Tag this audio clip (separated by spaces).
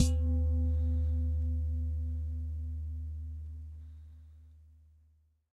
household percussion